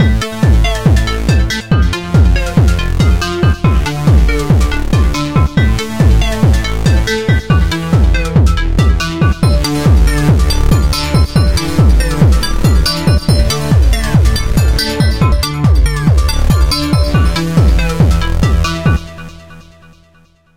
Last Dance
beat, rythm, drumn, bass